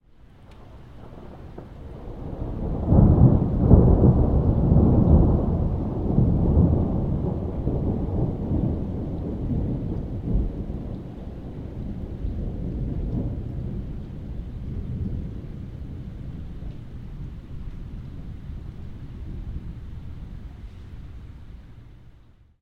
relámpago lightning lluvia rain
había una tormenta eléctrica por mi casa y de los varios audios que grabe uno es este
rayo, rumble, strike, thunder, weather